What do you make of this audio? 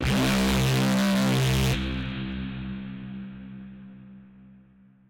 A reaper horn designed in FM8 under FL Studio.